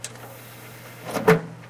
The source of this sound is and about 7 years old CD mechanic LG.